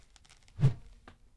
vine stretch and low whoosh 3
Foley SFX produced by my me and the other members of my foley class for the jungle car chase segment of the fourth Indiana Jones film.
low, whoosh